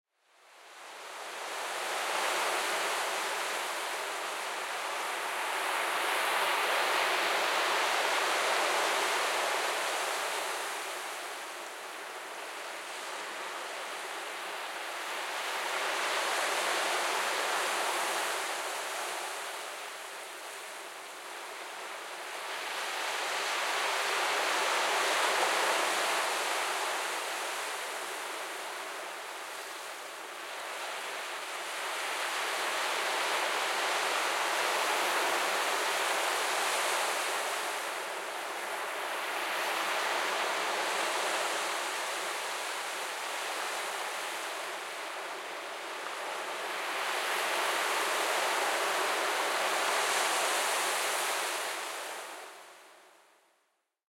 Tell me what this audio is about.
Seashore recorded from medium distance. Some faint seagulls can be heard in the background.
Zoom H4n with built-in XY capsules.
calm, flowing, lake, quiet, river, sea, sea-shore, shore, water